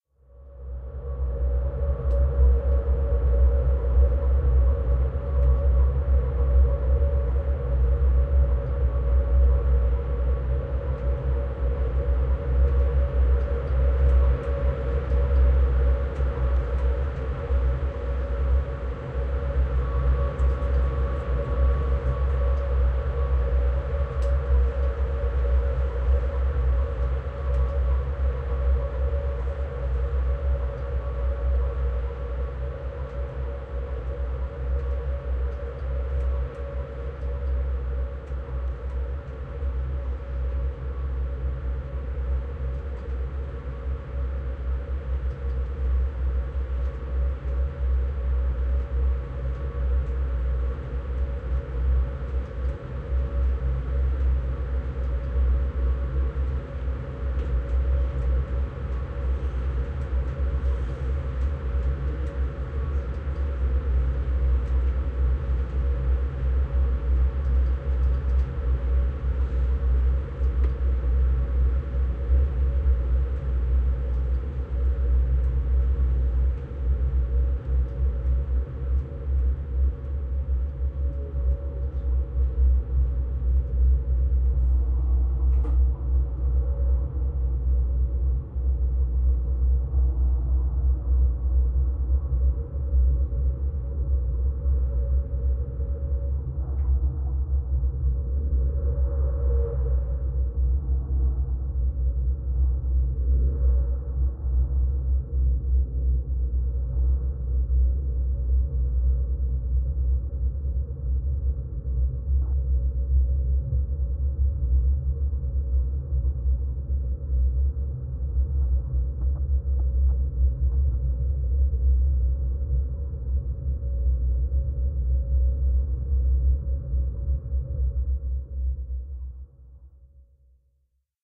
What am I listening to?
Outdoors train staition intuitively mastered (I am not a mastering expert) EQed etc.